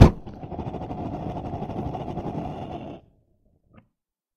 Gas furnace - Relaxed ignition
Gas furnace is ignited and starts to burn slowly.
6beat, 80bpm, blacksmith, fire, flame, gas, ignition, metalwork